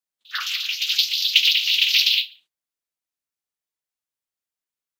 a possible other alien complaining about the food
alien, sf, space-ships, outerspace